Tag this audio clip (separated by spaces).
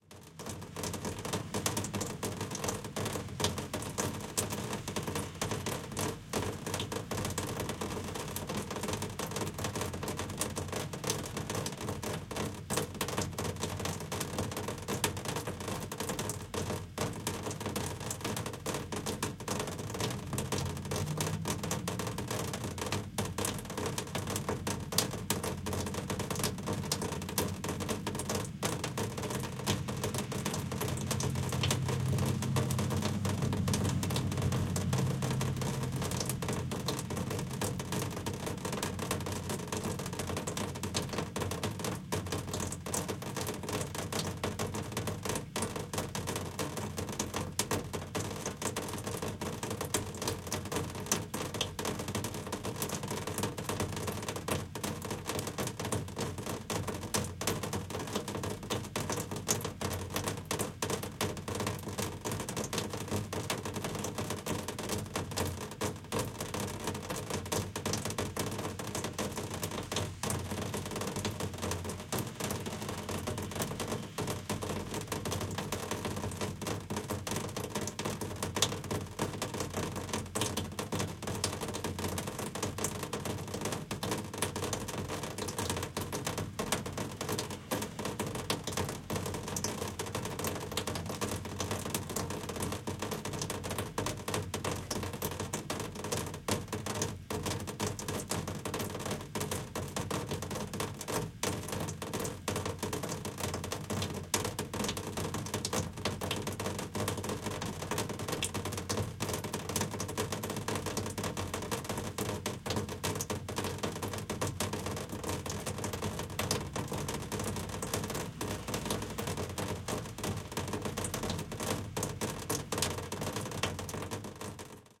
car Rain sheet-metal traffic